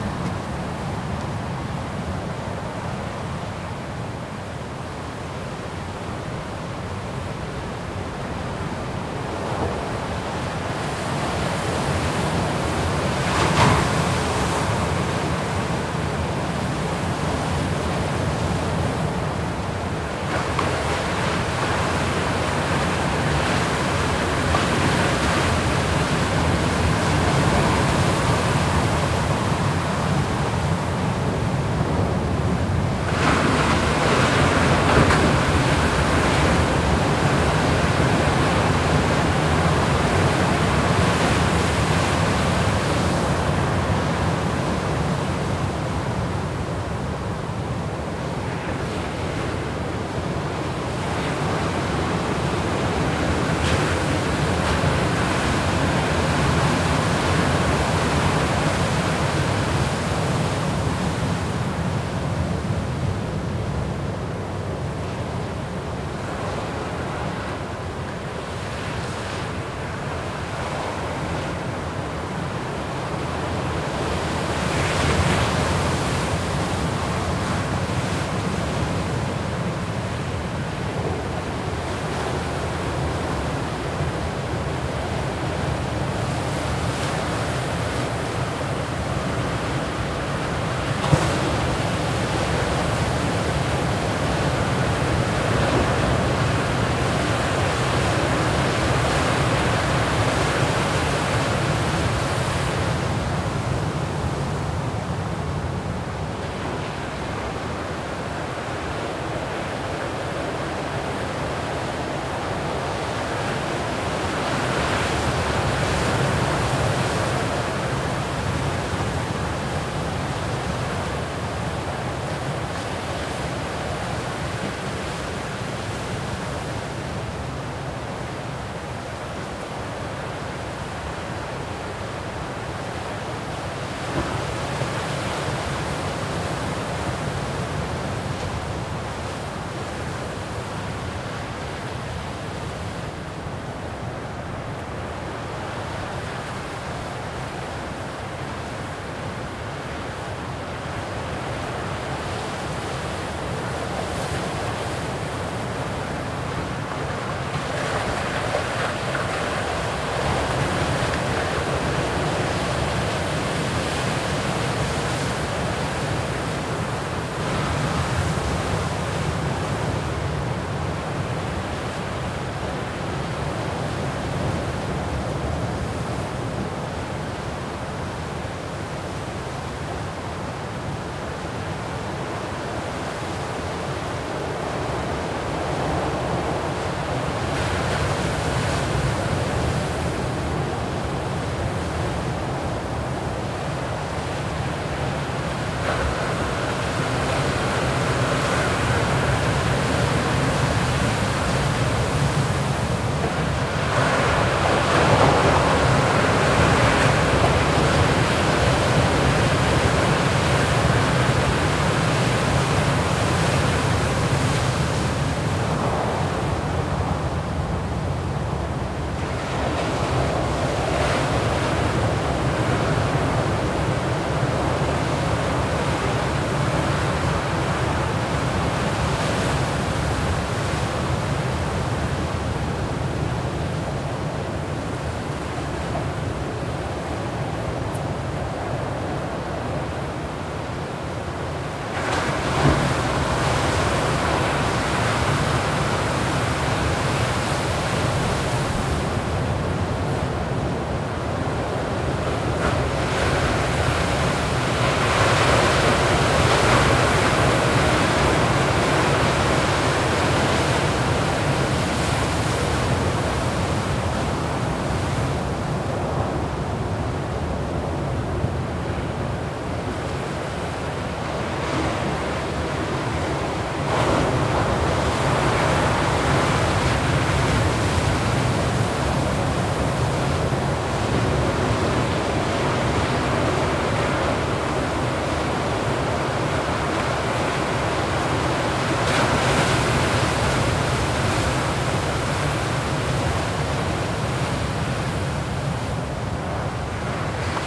Just a nice gentle wave and water sound clip